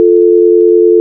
dtmf-dialtone-long
#!/bin/bash
# Create a file of the Digit "7" DTMF Tone
# synth 0.2 = 0.2 seconds
# Mon Aug 27 20:52:19 WIT 2012